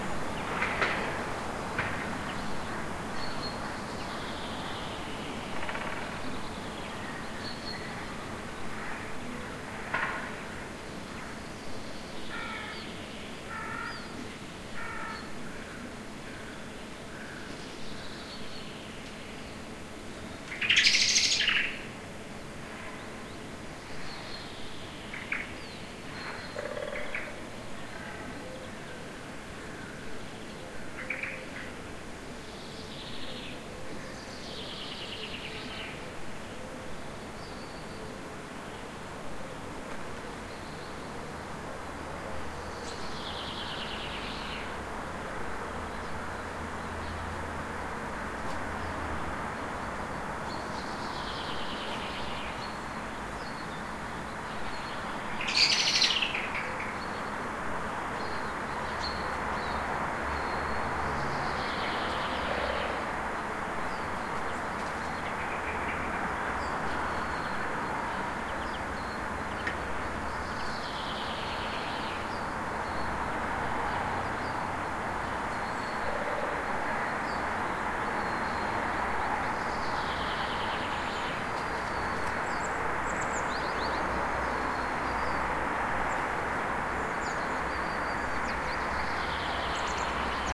garden nero 6 channel
Recorded with Zoom H2 at 7:30 am. Near street-noice with several birds
6channel, garden